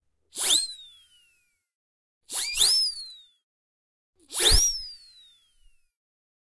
Siren Whistle 03 (3x)
Siren Whistle - effect used a lot in classic animation. Recorded with Zoom H4
silly, soundeffect, whistle